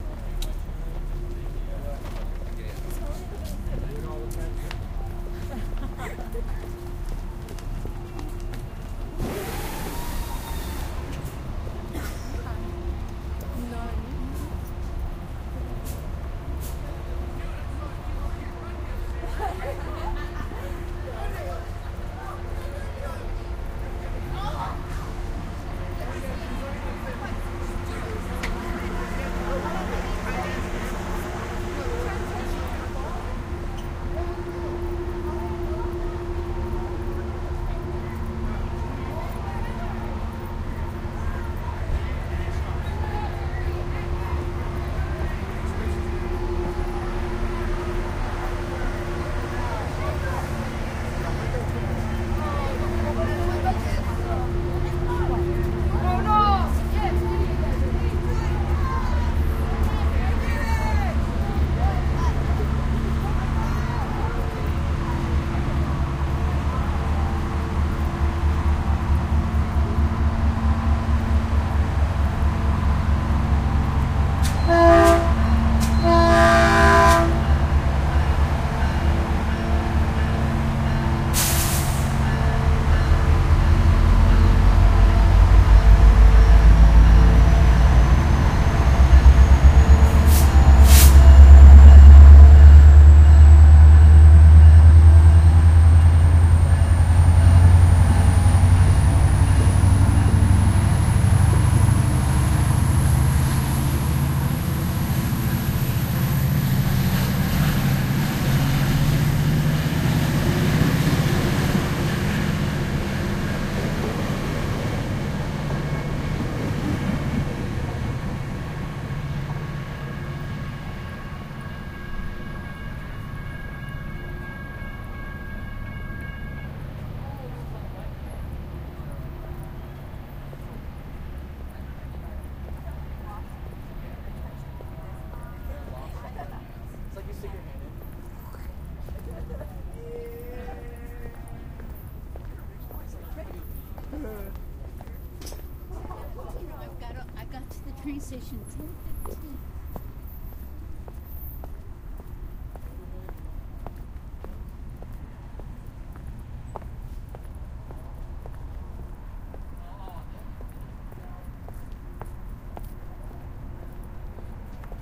Caltrain Departs Redwood City
train-station, field-recording